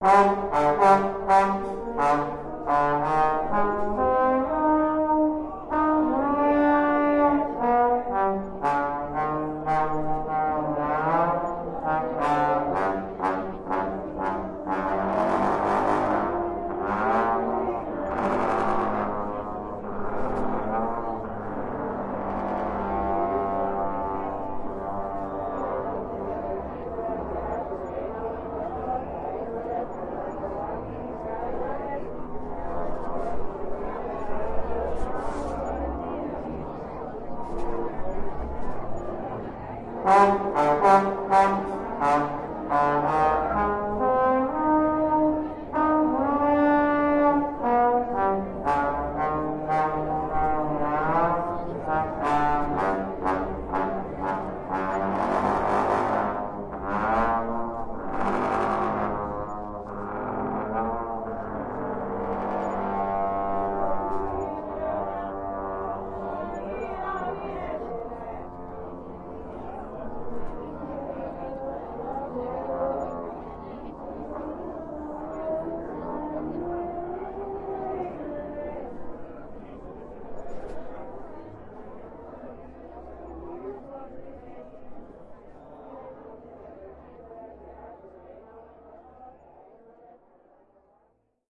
Recorded in the New Orleans French Quarter during early August 2017.
street, New, brass